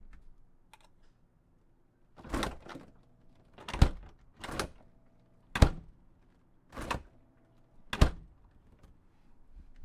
door open close (repeated)
a door, opening and closing
closing, door